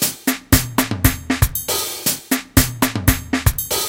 Electronic drum loop
Drum-loop, Drumming